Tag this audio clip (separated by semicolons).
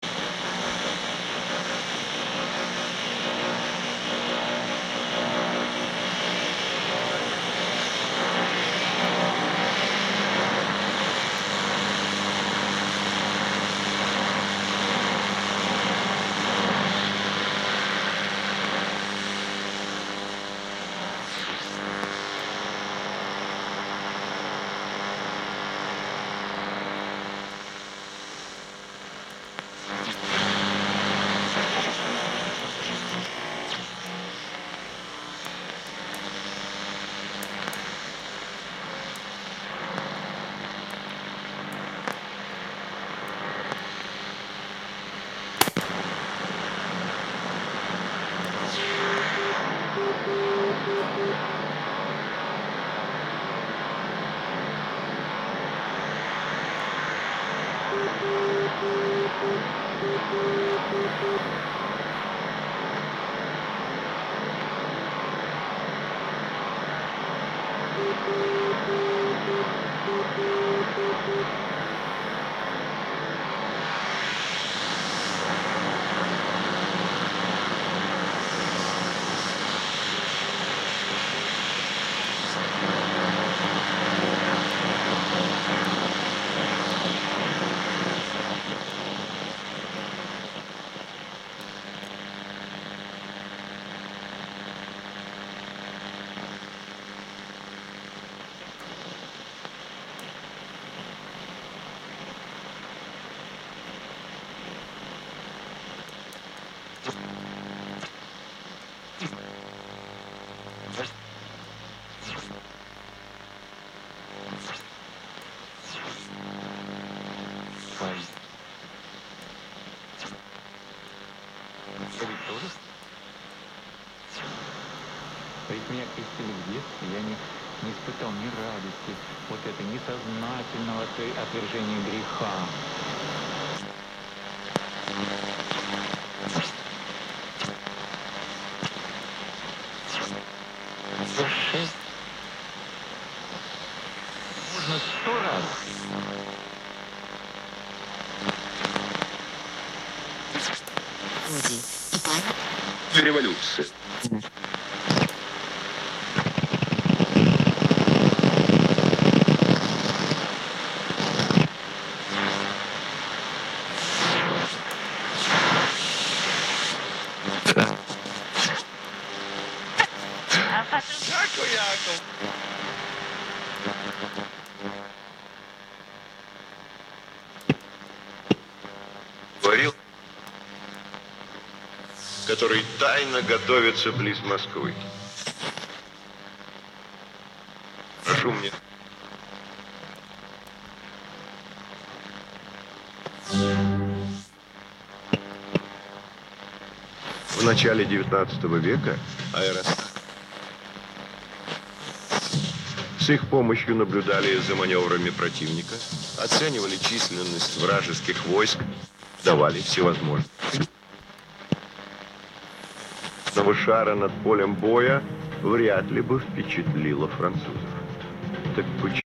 am; ambience; field; fm; frequency-modulation; interesting; interference; noise; old; radio; tuning; waves; white-noise